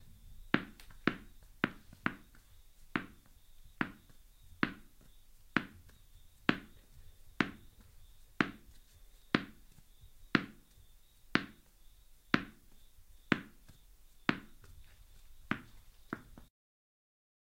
Bounceing Ball
Tennis ball bounce without Tennis racket. Recorded on a Tascam dr-40 Recorder.